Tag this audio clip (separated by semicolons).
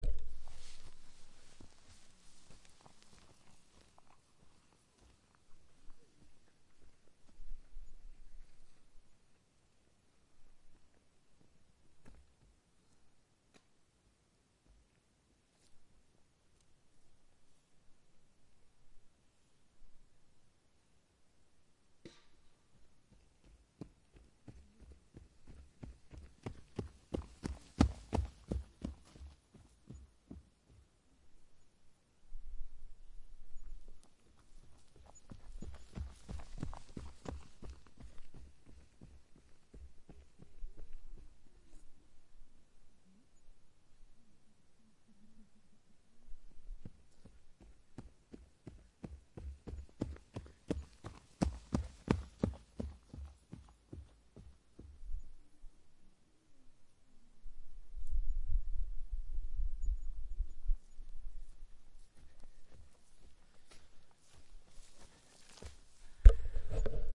IN; RUNNING; WOODS